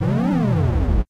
Enemy emerge
nintendo, old, sega, game, video, atari, games, sounds, console